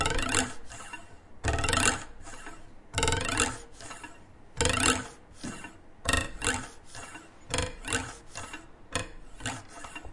snap a ruler on the school bench table